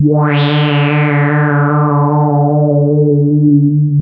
Multisamples created with subsynth. Eerie horror film sound in middle and higher registers.
subtractive, synthesis, horror, evil